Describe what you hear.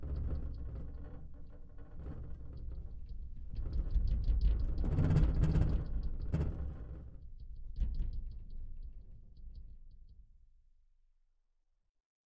Short earthquake with window rumble